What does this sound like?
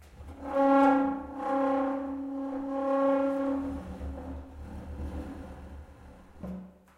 Queneau Grince Chaise Table 07
frottement grincement d'une chaise sur le sol
floor, desk, classroom, dragging, drag, chair, table